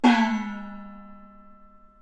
Recording of a single stroke played on the instrument Daluo, a gong used in Beijing Opera percussion ensembles. Played by Ying Wan of the London Jing Kun Opera Association. Recorded by Mi Tian at the Centre for Digital Music, Queen Mary University of London, UK in September 2013 using an AKG C414 microphone under studio conditions. This example is a part of the "daluo" class of the training dataset used in [1].
beijing-opera, china, chinese, chinese-traditional, compmusic, daluo-instrument, gong, icassp2014-dataset, idiophone, peking-opera, percussion, qmul